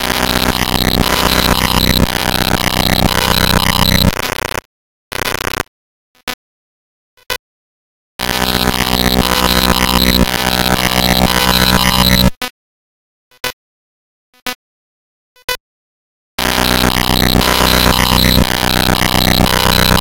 Sound made with glitch machine on iphone
get RPN code from iphone/ipod/ipad: